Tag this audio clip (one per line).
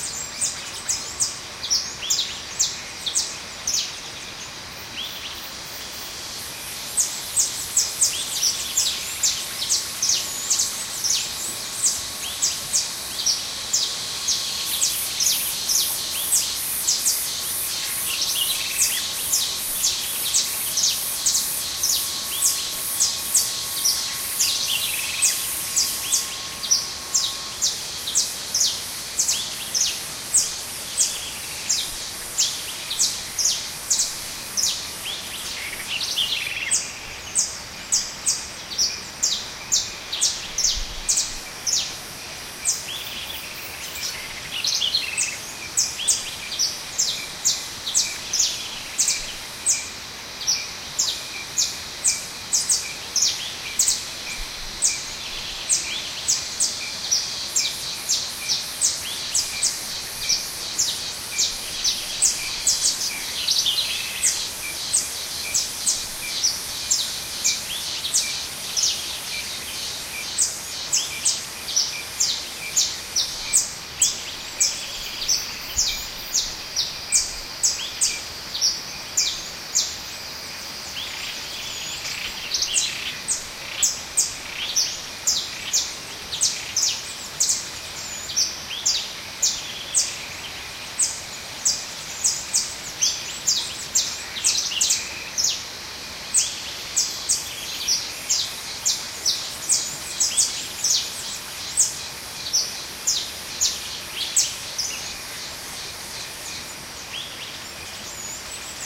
atlantica
bird
birds
brasil
brazil
cicada
forest
jungle
mata
passaros
river
woods